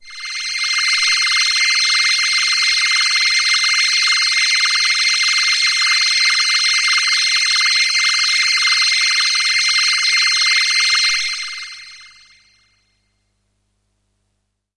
Space Pad - C6
This is a sample from my Q Rack hardware synth. It is part of the "Q multi 012: Spacepad" sample pack. The sound is on the key in the name of the file. A space pad suitable for outer space work or other ambient locations.
ambient, electronic, multi-sample, pad, space, space-pad, synth, waldorf